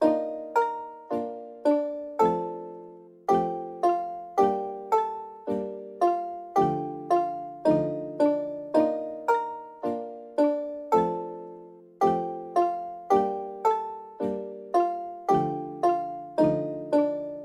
SFX for the game "In search of the fallen star". This is the song that plays in the farm section.
ambience, atmosphere, midi, music, relaxing